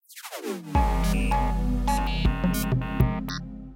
Robo Sketch
A small synthesizer glitch sequence layered with TR808 drums.
80bpm, drums, formant, futuristic, glitch, logo, machine, mechanical, pad, robotic, space, synthesizer, tr808, wobble